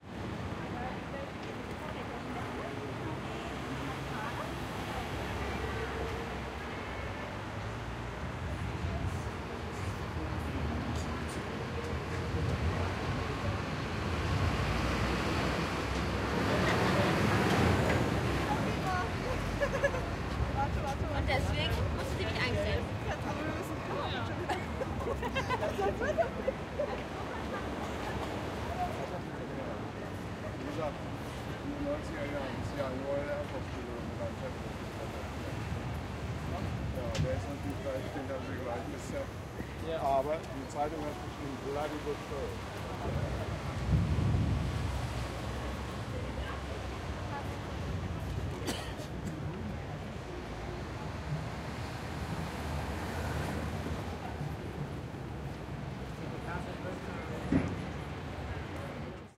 Ambience of Tower Bridge during the daytime.
Buy Me A Coffee
Ambience, Atmos, Bridge, Cars, City, Crowds, Landmark, London, Street, Tourism, Tourists, Tourist-Spot, Tower-Bridge, Traffic